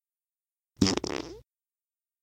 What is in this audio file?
game poot 5
A poot recorded with relatively good quality, ie. low noise, no echo, etc. Post-processed using Audacity (equalization, amplification, noise-reduction) to achieve more consistent levels and fidelity. Recorded on a Moto G5 Plus (smartphone) using Easy Voice Recorder (Android).
Processed with Audacity for a more consistent tone and volume.